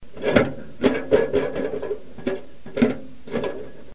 the sound of a cover on the end of a stove pipe as it is rattled by
wind (wind is not heard); not processed; recorded here in Halifax
field-recording, rattle, stove-pipe, wind